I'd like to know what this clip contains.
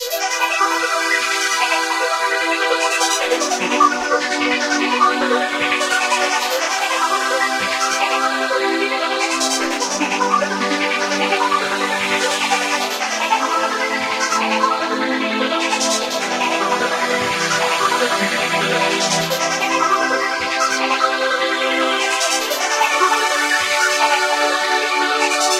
This riff was highly influenced by the Trance_coder_6 and Trance_coder_7 files uploaded by Zin. I like the sound and feeling in his files so i elaborated a little and came up with this pad and arp. enjoy.
150-bpm, progression, pad, trance, techno, synth, sequence, phase, drum, bassline, beat, distorted, hard, melody, drumloop, bass, flange